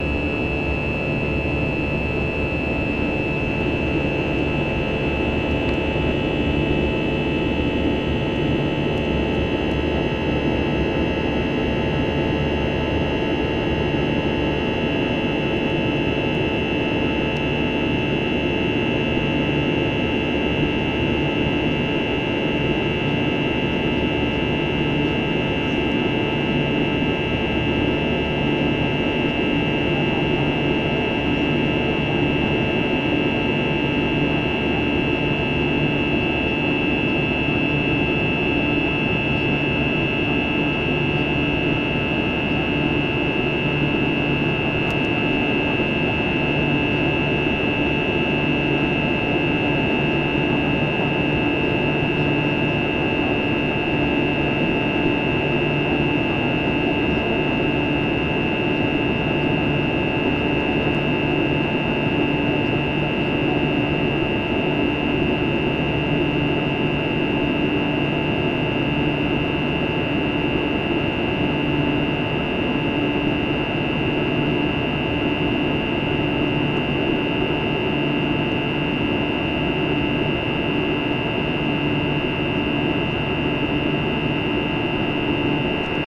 airplane-interior-volo-inflight strong
this bank contains some cabin recordings by a contact mic placed in different locations.
recorded by a DY piezo mic+ Zoom H2m
air-berlin, aircraft, airplane, berlin, cabin-noise, contact, field-recordings, flight, jet, jet-engine, mono, piezo, transportation